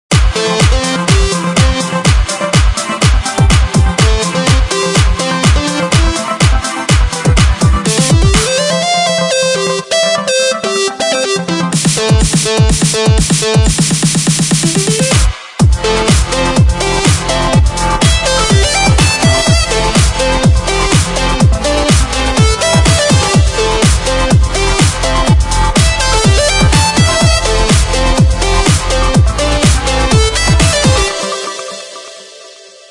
Say What You Mean Loop
Another clip from one of my uncompleted tracks. Like all my loops, it is not even 50% finished and is for anybody to do as they wish.
2013, bass, beat, clip, club, comppression, delay, drums, dubstep, electronic, eq, flanger, free, fruity-loops, fx, house, limters, loop, mastering, practise, reverb, sample, synths, trance